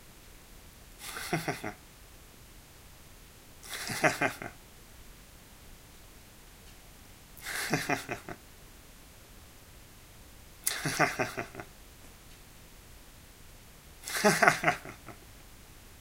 SampleRequest ShortLaugh
Recorder: SONY MD MZ-RH1 (Linear PCM; Rec level: manual 19)
Mic: SONY ECM CS10 (Phantom powered; Position: Front of speaker)
Recording of a (short) laugh as per sample request
male, sample-request, voice